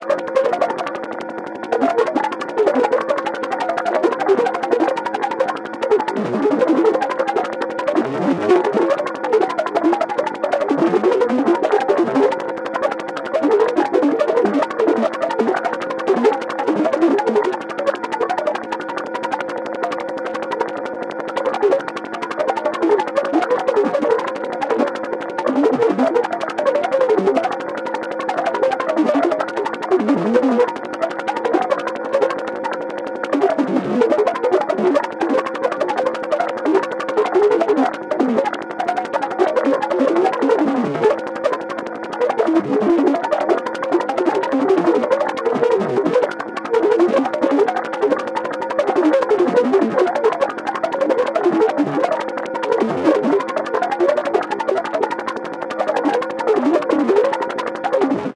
Biomechanic emotion
A rhythmic sample with an emotional feel. Sample generated via computer synthesis.
Engine, Loop, Mechanical, Percussive, Repetition, Sequence